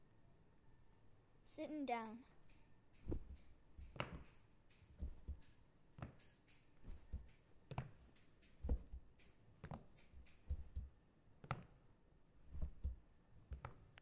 sonicsnaps GemsEtoy davidsitting down
Etoy, TCR, sonicsnaps